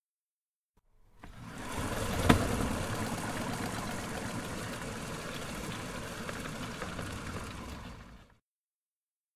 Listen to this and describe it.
Sound of household chores.
chores, CZ, Czech, household, Pansk, Panska